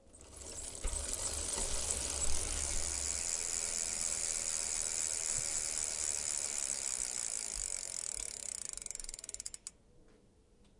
Bike Pedal and Chain
Intense bike pedaling, coasting, and braking.
pedals, bike, chain, broken, brakes, start